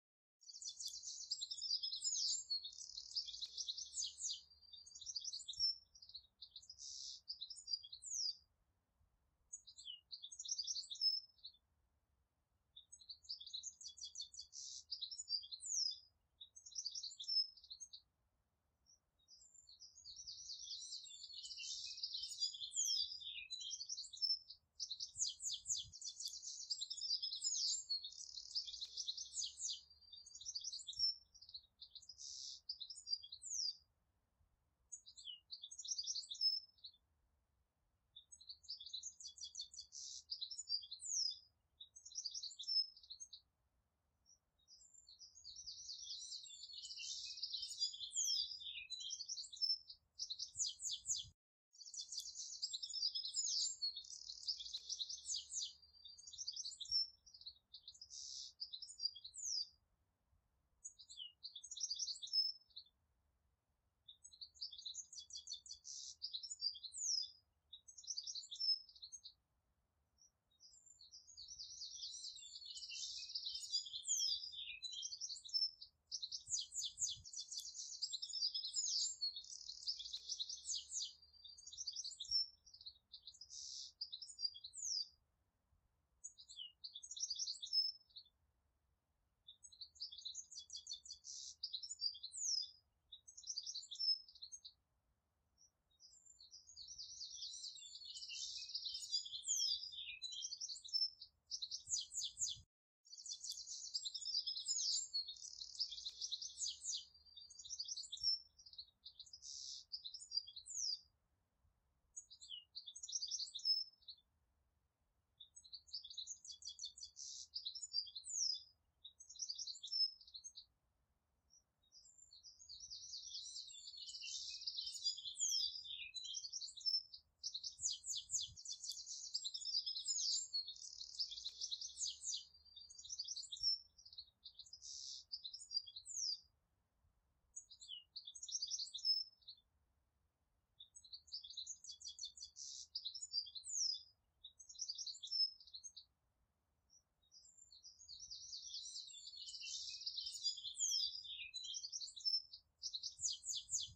The sound of birds chirping on my way to work, I have edited out the background noise and duplicated the track a few times in order to give it a longer play time.